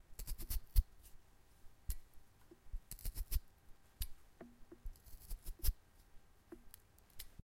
Spade Digging Foley
Poking fork into potatoe